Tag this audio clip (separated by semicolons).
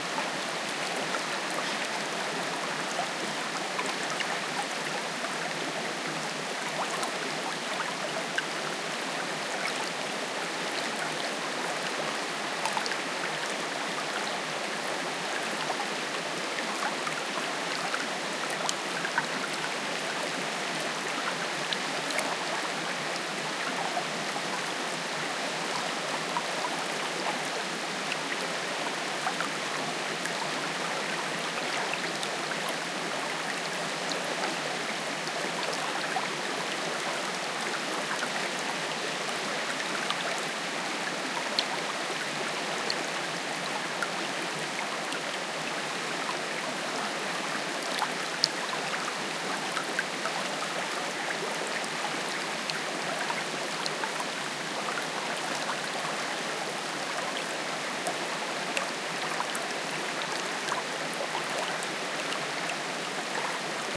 field-recording; river; soundscape; water; waterfall